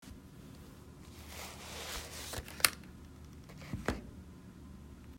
taking off headphones and setting them down
object, headphones, putting, item, workspace, down